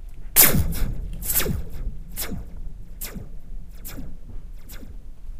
Laser two
Another metallic laser sound with quite a long echo. Little bit darker than the first one.
Made with a metal Springy. Recorded indoors, with Zoom H4.
shoot, lazer, hard, gun, zap, shooting, echo, shot, weapon, laser, metallic